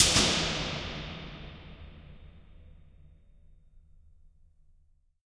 Concrete Tunnel 04
Impulse response of a long underground concrete tunnel. There are 7 impulses of this space in the pack.
IR, Reverb, Response, Impulse, Tunnel